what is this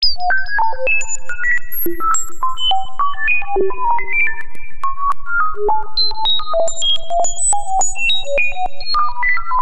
computer heart 2
android, computer